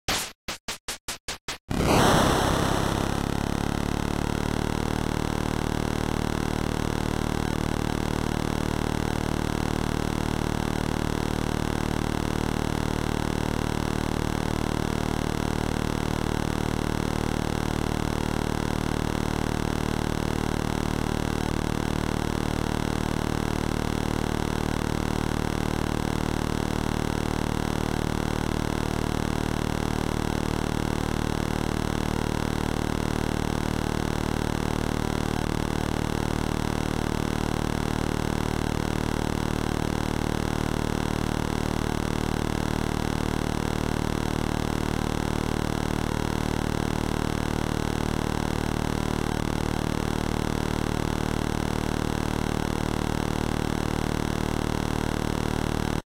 8-bit car engine
A sound made in Famitracker that could be used to portray a car starting up and driving, or to portray an engine running.
8-bit, automobile, drive, engine, game, retro, truck, vehicle